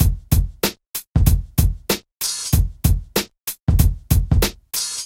Hip-hop beat 1 95
A hip hop beat for a song a made